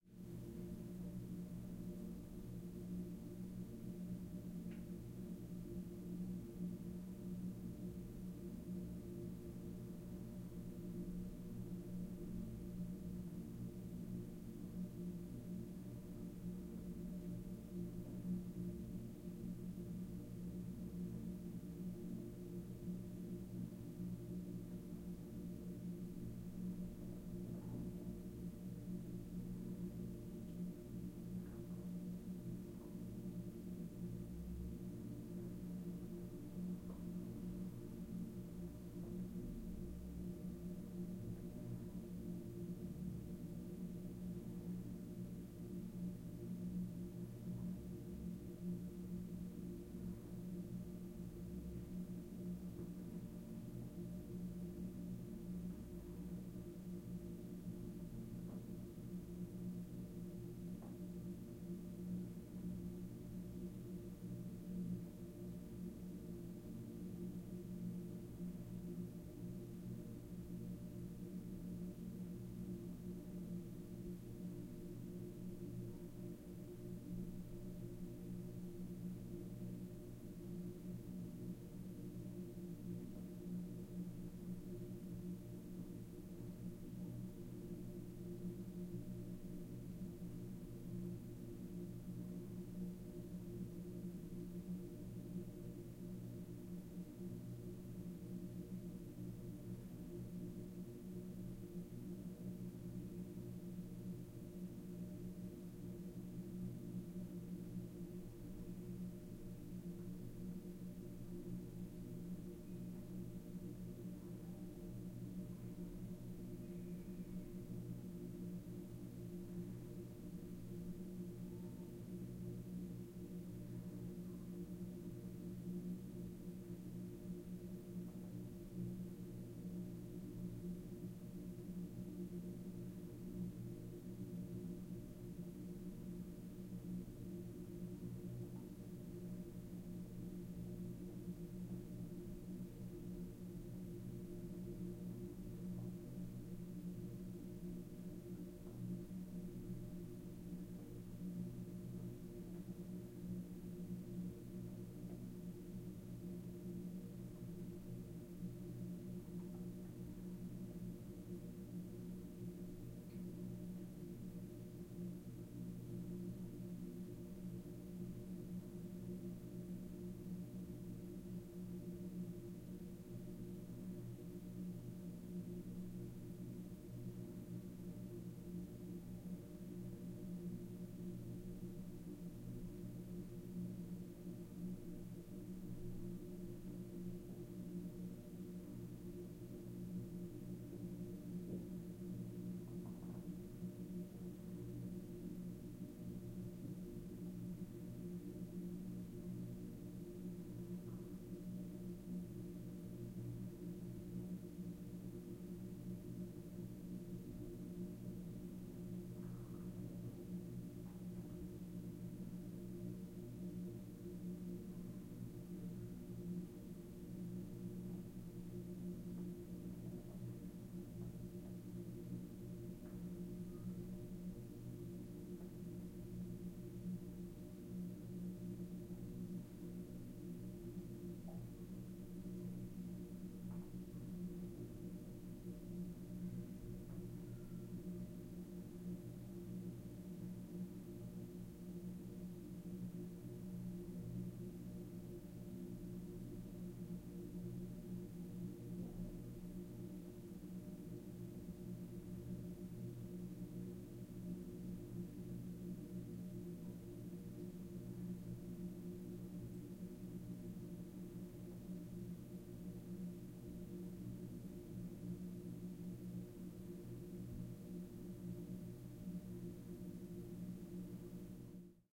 Room Tone Toilet
Room tone of a small toilet, with ventilation system and light on, very reverberant, rather creepy.
Creepy, Home, House, Indoors, Resonance, Roomtone, Toilet, Ventilation